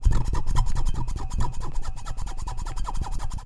recording of a speaker being scratched